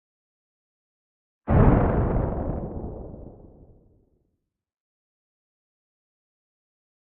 Synthesized Thunder 02
Synthesized using a Korg microKorg
weather, synthesis